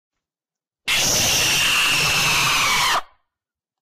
This recording was supposed to be of me screaming, but the microphone was bad quality and it coudn't hear me properly. I'm a loud screamer.
screech, shout, scream, yelling, yell, shouting, weird, loud, screaming